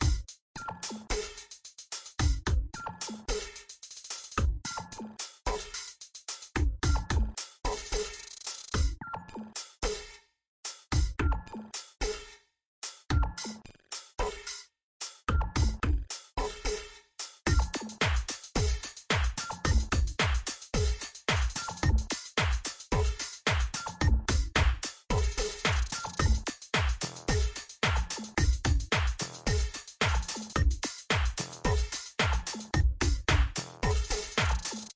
glitch hop drums
110-bpm, percussive, glitch, rhythmic, drum-loop, drums, percussion-loop, glitch-hop